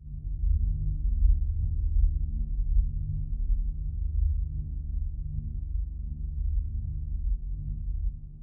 Dark Sci-Fi Wind
Short dark noise background for sci-fi hallways, space ships, etc.
wind, dark, synth, sci-fi, atmosphere